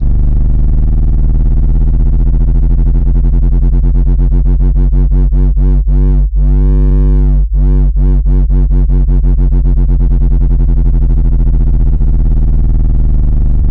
sub-wobble-up-and-down
Sound effect or weird sub bass wobble down loop. 8 bars in length at 140 bpm
I used audacity to generate two tones both 49 or G (g1). On one I applied a sliding pitch shift down a full octave. For some reason this creates a nice wobble down. I duplicated it, and reversed the first half to make a longer wobble up and down.
140-bpm, 140bpm, audacity, bass, down, dub, dubstep, loop, low, pitch-shift, power, power-down, power-up, sine, sub, sub-bass, tech, technology, up, wobble